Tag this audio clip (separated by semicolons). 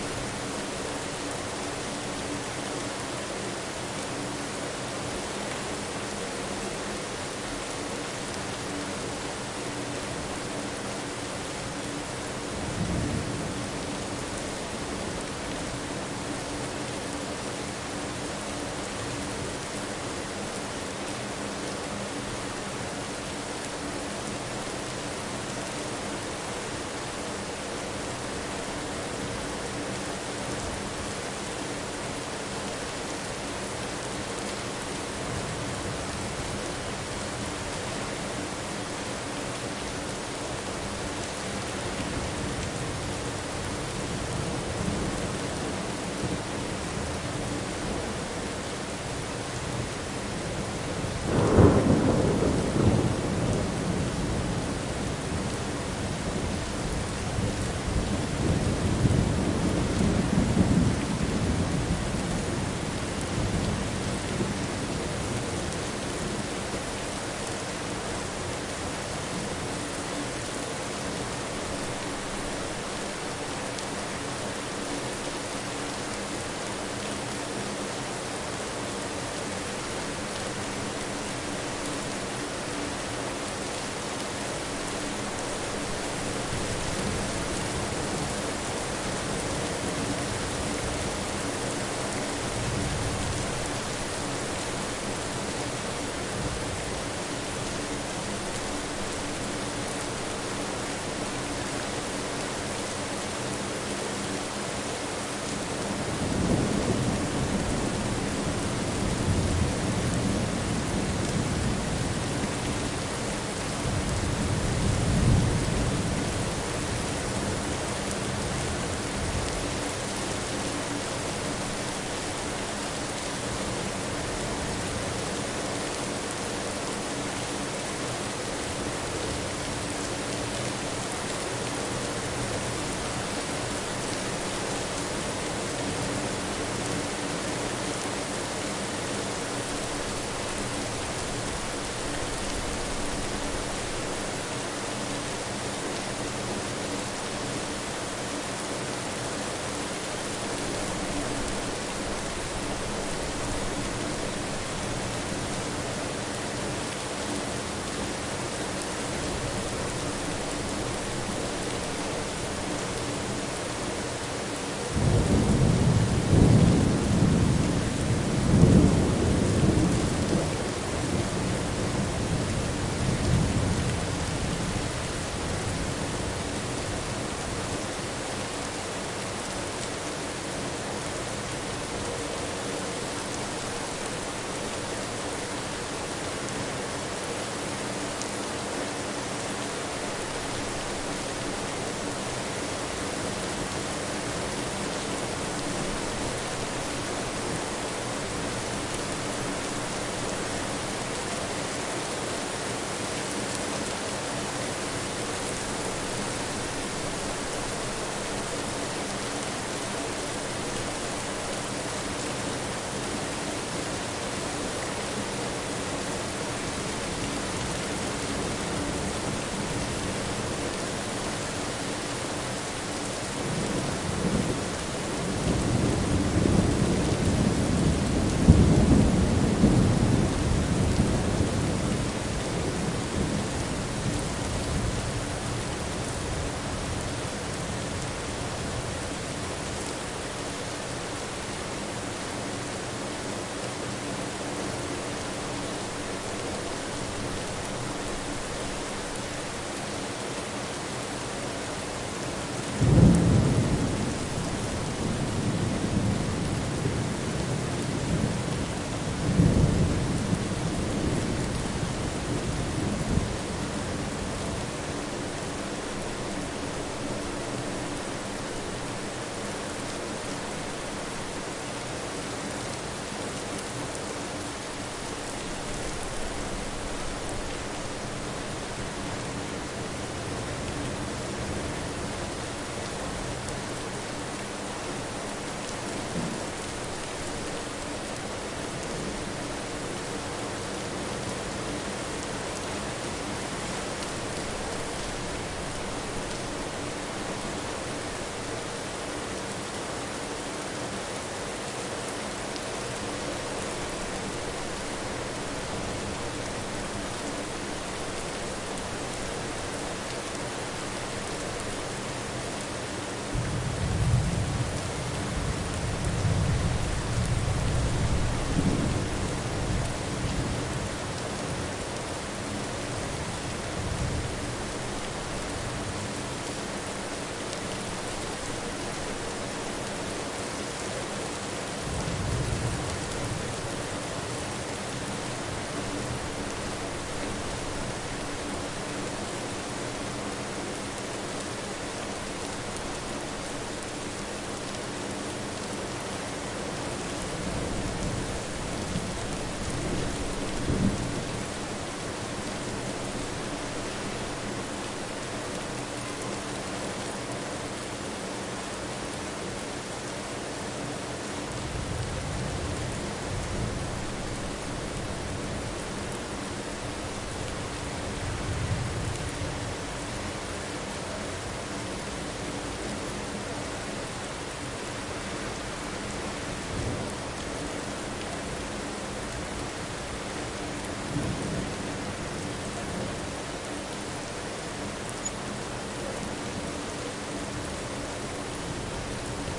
rain; weather